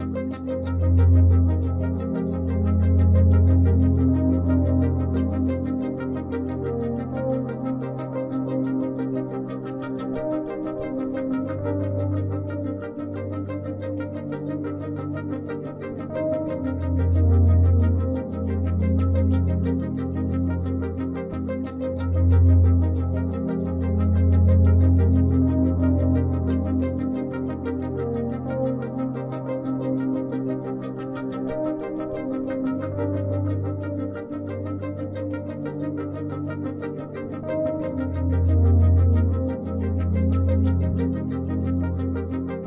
sound of the Night